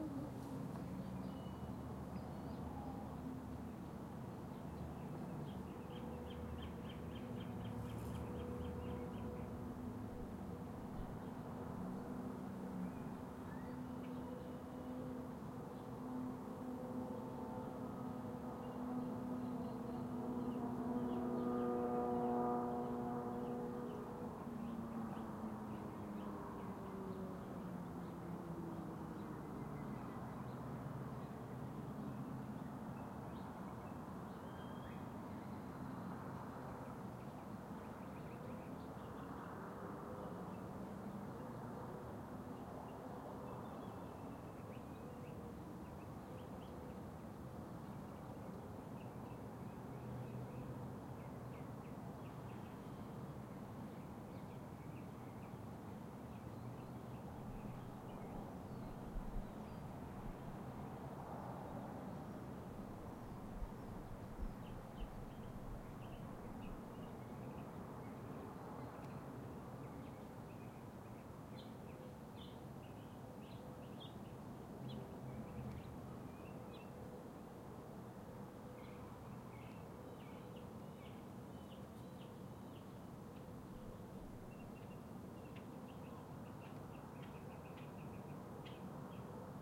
Garage ambience, urban light-7eqa 01-02
Recorded with Zoom H4N in Arizona. Basic low cut filer applied. Apply my good people.
ambience, background, field, recording